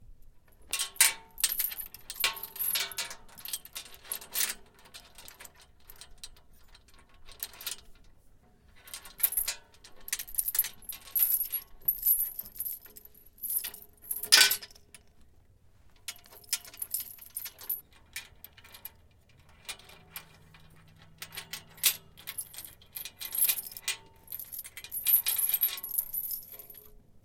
Gate Chain 01
Chain latch on a gate being connected and disconnected.
Earthworks TC25 > Marantz PMD661